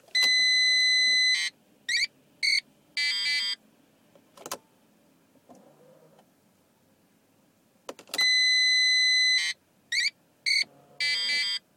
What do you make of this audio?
Ignition of mercedes 3enz E
Recorded with a smart phone
car, ignition, mercedes